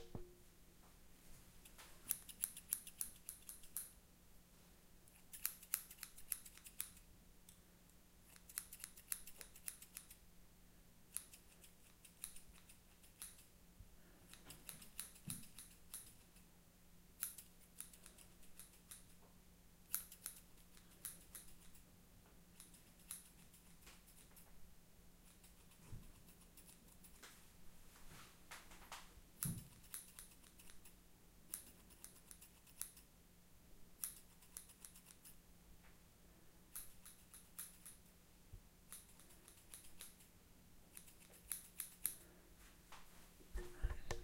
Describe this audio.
Snipping with hairdresser scissors, recorded close working around the head. No combing or other noise.